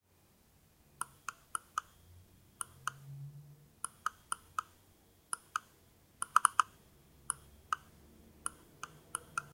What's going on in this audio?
020 - Mose wheel clicks 1.L
sound of mouse scroll wheel clicks
click, clicking, computer, mouse, office, scroll